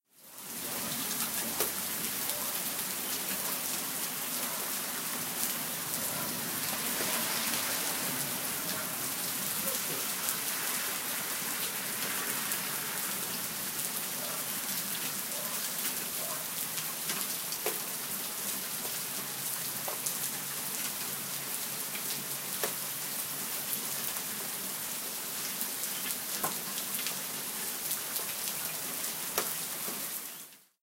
Recorded with an iPhone, during a storm. Rain and some neighborhood ambience.
nature, rain, raining, rainstorm, rainy, relaxing, storm, water, weather, wet